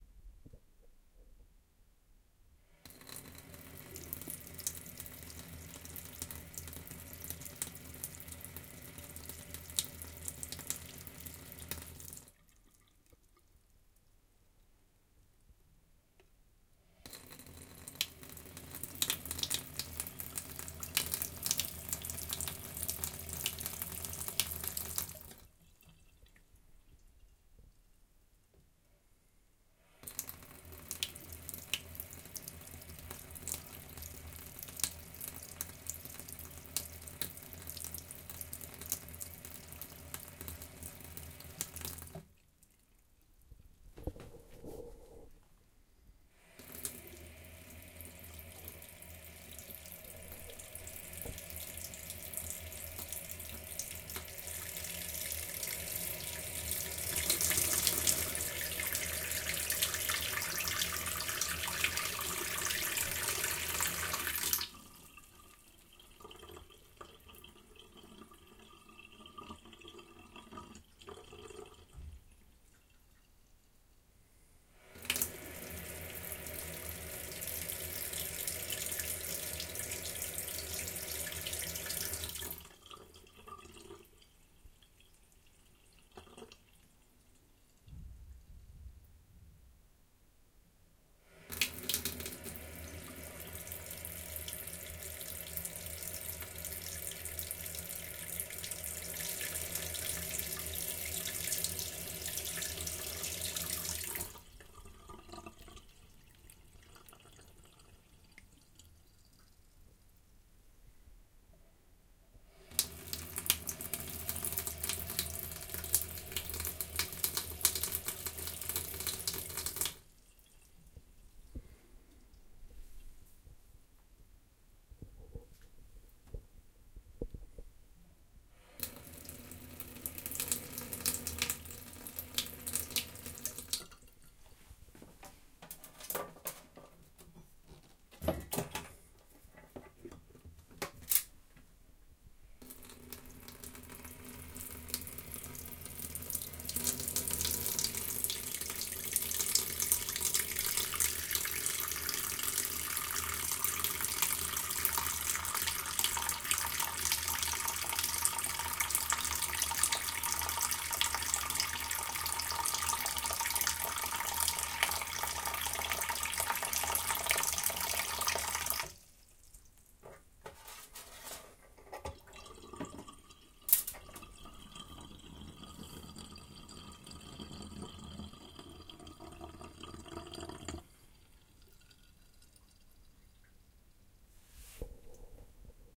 Tap Running & water draining in sink
A tap being turned on and off, with water draining at the end. Some handling noise in places, sorry about that. Has been recorded using a filter at 115Hz.
Sink, Tap, Bathroom